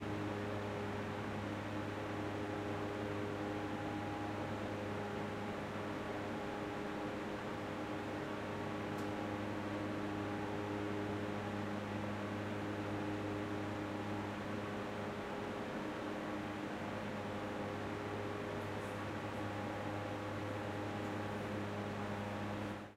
City Garage ventilation system
Ventilation system in parking garage